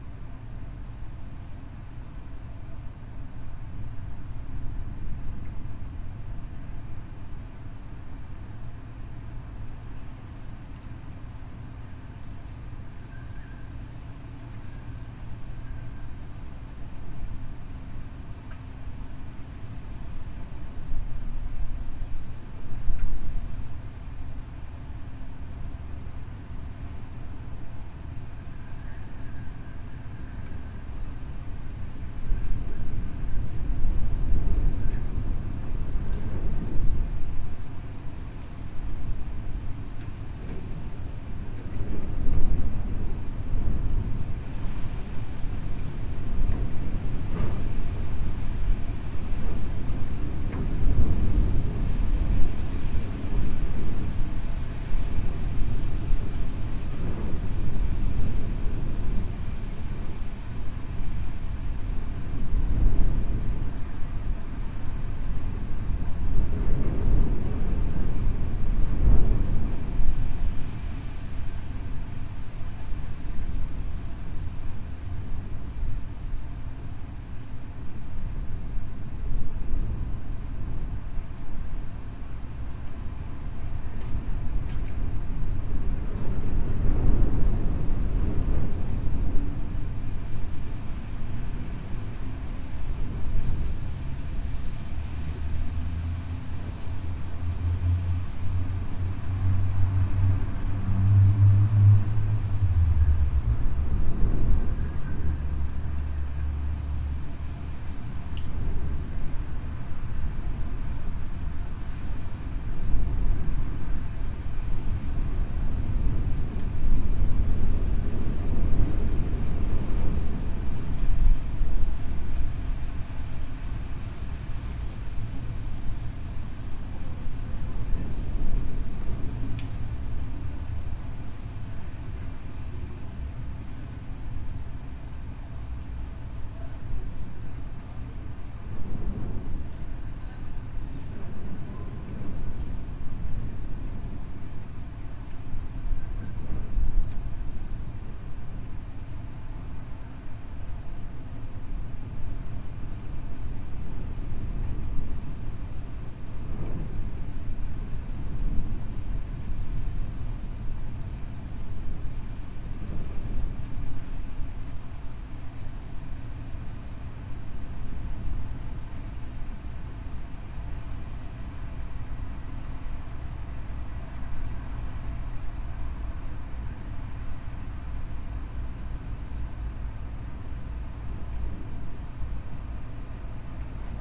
Windy Nantes 2014 Oct 12
A windy night in Nantes recorded with a Roland R05 (in-built mic). air conditioning system is running on the background. A small garden with vegetation is just in front of the recorder
night, field-recording, wind, france, ambiance, nantes